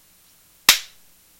face punch
A single punch to another person.